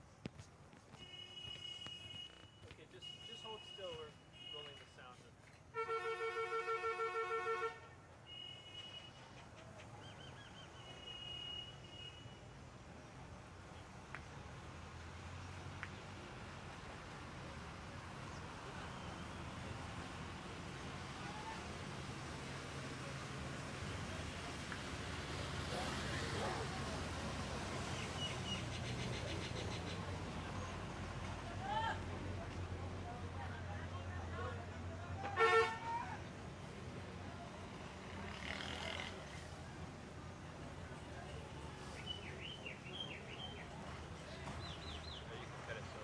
ambience street Pakistan Thar Desert Town Horns cars crowds field-recording
ambience, cars, Desert, field-recording, street, Town